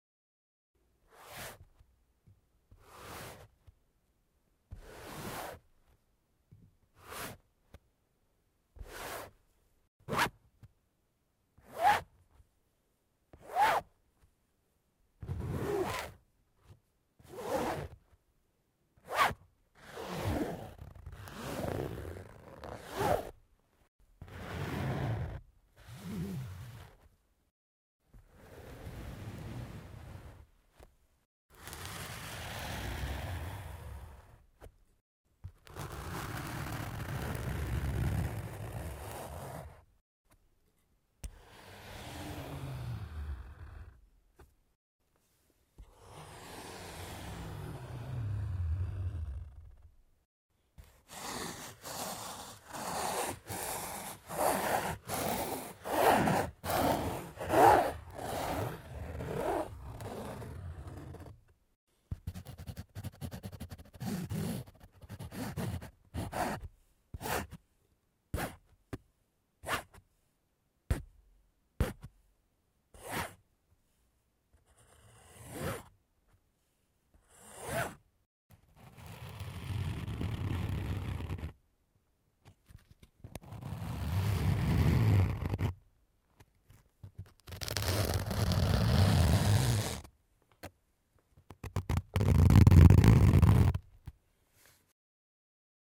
uña en tela dura 2
close-up, scratch, tela